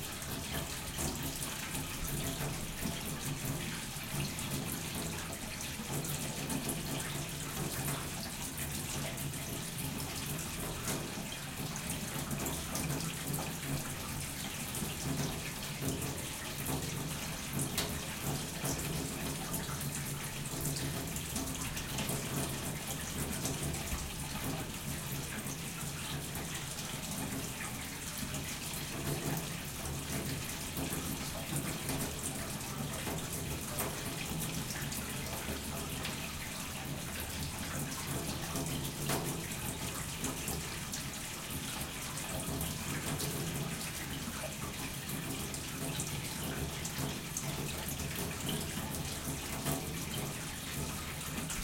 water run from tap faucet into large metal sink roomy
faucet, from, into, large, metal, run, sink, tap, water